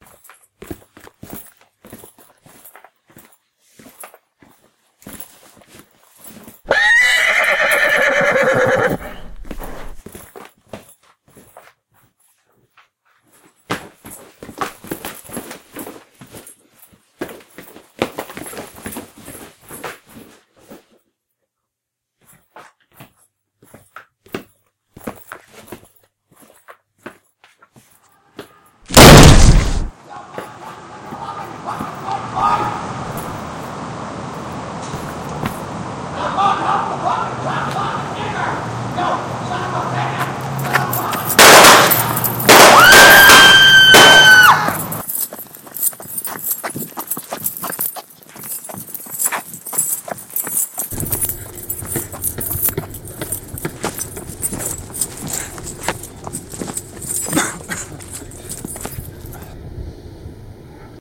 The Wild Wild West ( No music)
Wild west Simulation Your riding your horse you hear arguing in a bar you open the door they start shooting you go back out the door and run away ( without Music)